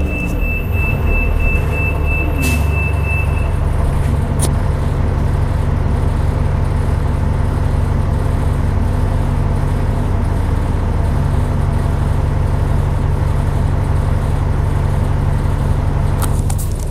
Recorded during a 12 hour work day. Pressing the built in microphone as flush as possible against various surfaces on the bus.